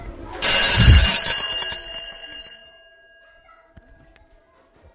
Our bell is short. You can listen it
Aboutheschools, France, Pac, Scholbell, TCR